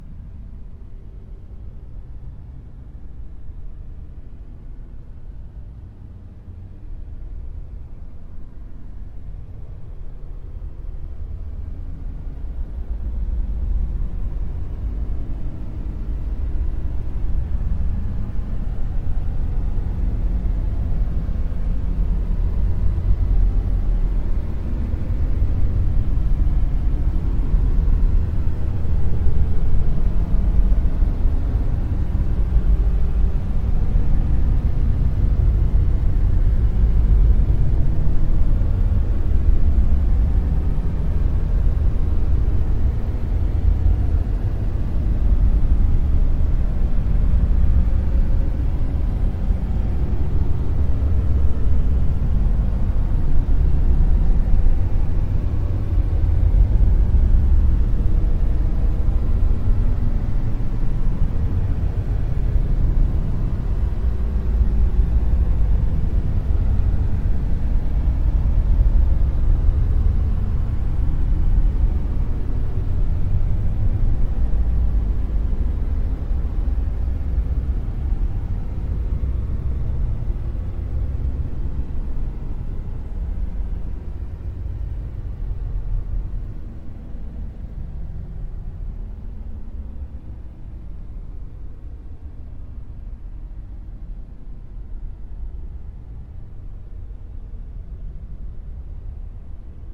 As per the other "bigwave to shallow" sounds, but stretched a bit using Paulstretch in Audacity.